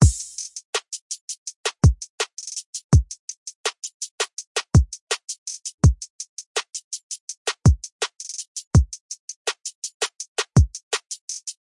Hip-Hop Drum Loop - 165bpm
Hip-hop drum loop at 165bpm
beat, clap, drum, drum-loop, drums, hat, hi-hat, hip-hop, hip-hop-drums, hip-hop-loop, kick, loop, percussion, percussion-loop, rap, snare, trap